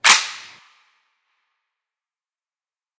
Wasr RPK Charge Foreward1
An AK's charging handle being let go.